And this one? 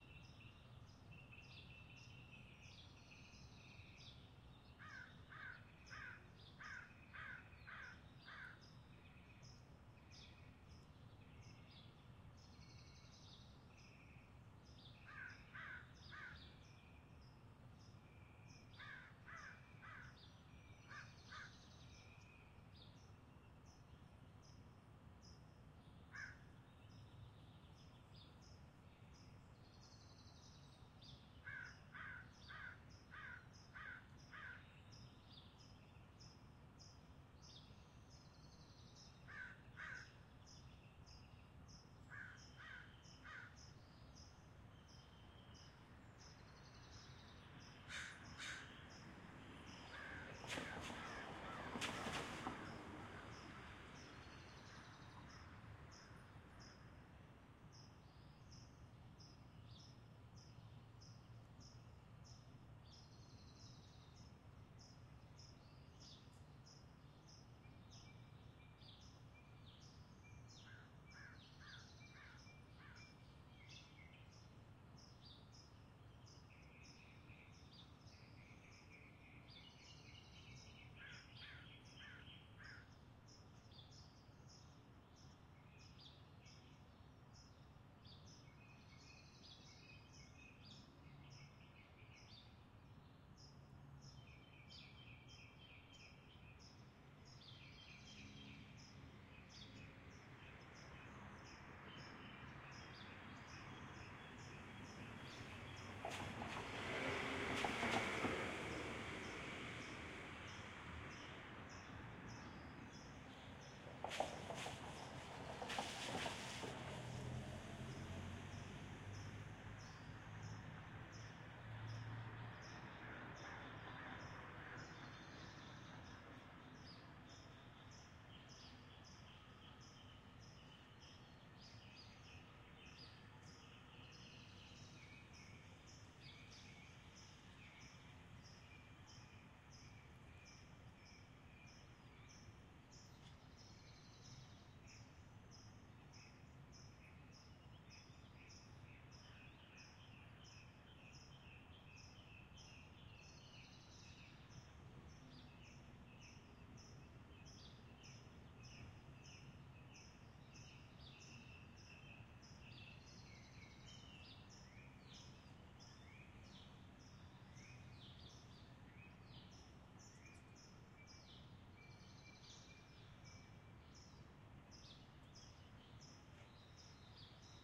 AMB Ext Residential Day Stereo 002
I revisited my Los Angeles neighborhood with a brand new stereo microphone. I've now recorded the morning birds and traffic from several perspectives.
In this one there are a number of cars driving by, splashing thru a puddle in the road.
Recorded with: Audio Technica BP4025, Fostex FR2Le
traffic, birds, residential, ambience, morning, neighborhood, cars, crows, stereo